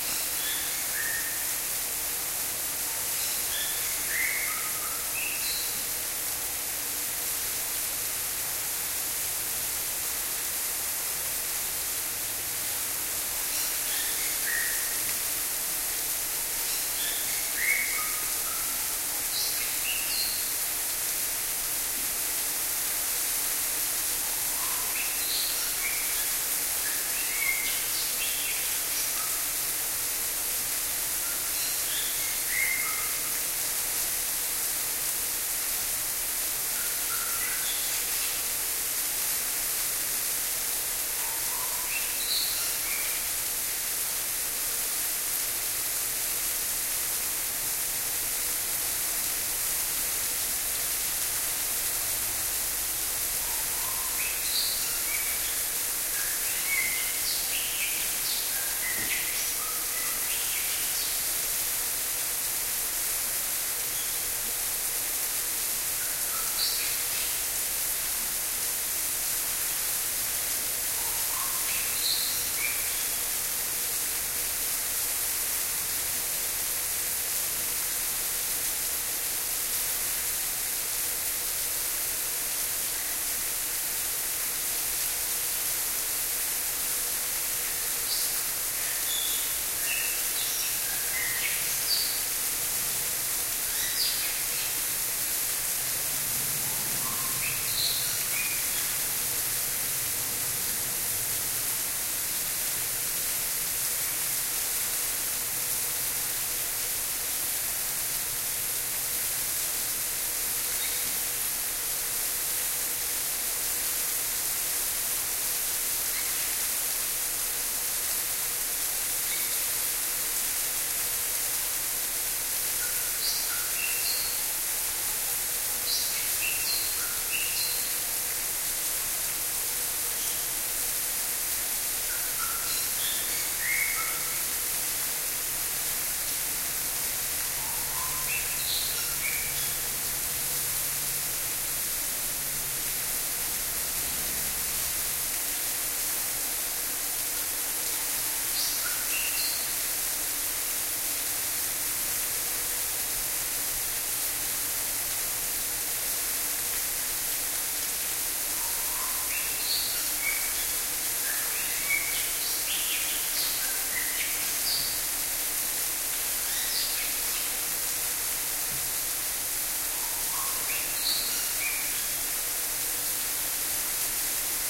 This is a quiet ambiance in an indoor jungle, with a waterfall and the song of a Bali Starling throughout.